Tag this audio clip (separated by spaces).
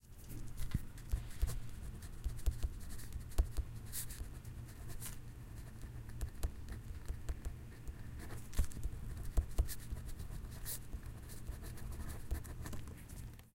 Elaine Field-Recording Koontz Park Point University